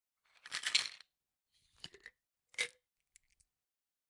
taking pills out of a plastic container